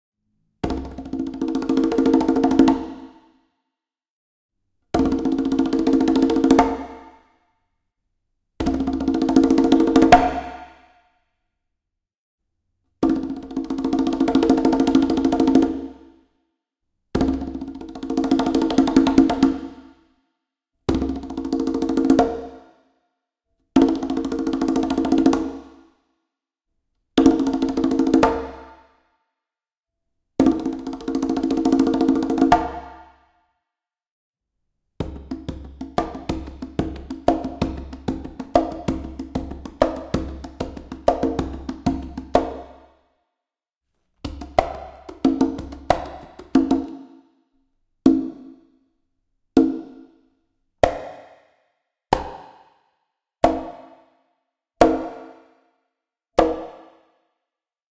low conga wet
Lower tuned conga samples, rolls, short grooves, etc. with added quality reverberation.